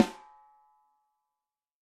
This sample pack contains 109 samples of a Ludwig Accent Combo 14x6 snare drum played by drummer Kent Breckner and recorded with eight different microphones and multiple velocity layers. For each microphone there are ten velocity layers but in addition there is a ‘combi’ set which is a mixture of my three favorite mics with ten velocity layers and a ‘special’ set featuring those three mics with some processing and nineteen velocity layers, the even-numbered ones being interpolated. The microphones used were a Shure SM57, a Beyer Dynamic M201, a Josephson e22s, a Josephson C42, a Neumann TLM103, an Electrovoice RE20, an Electrovoice ND868 and an Audio Technica Pro37R. Placement of mic varied according to sensitivity and polar pattern. Preamps used were NPNG and Millennia Media and all sources were recorded directly to Pro Tools through Frontier Design Group and Digidesign converters. Final editing and processing was carried out in Cool Edit Pro.